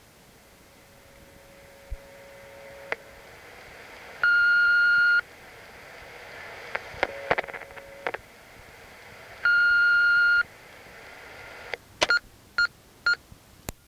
answering machine beeps clicks phone line hum april 95
Telephone static, clicks, beeps from answering machine. April 1995.
answering, beeps, machine, phone, telephone